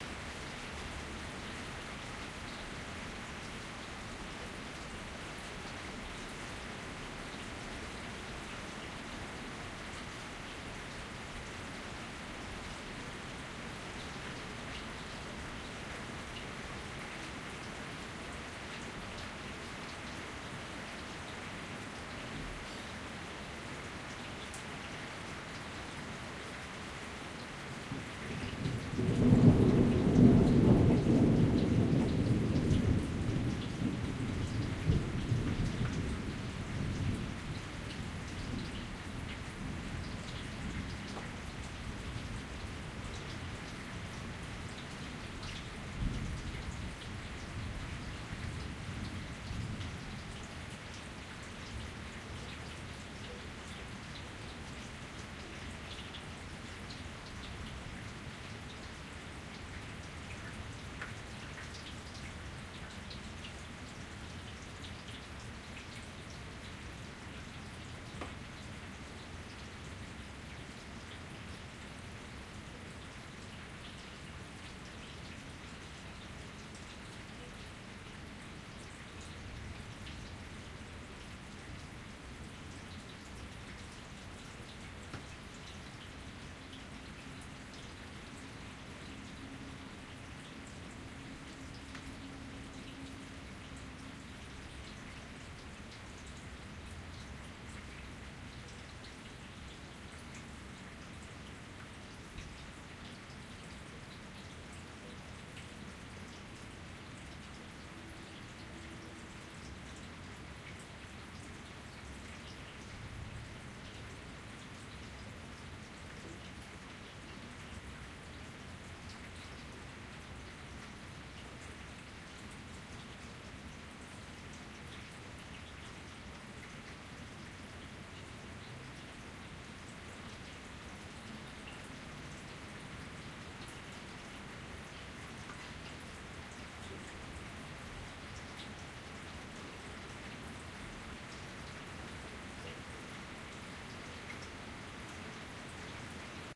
Rolling Thunder Dec 2007 3
This recording is more rain than thunder. Rain on paving with thunder. I will upload the uncompressed version if anyone is interested.
field-recording, thunder, atmosphere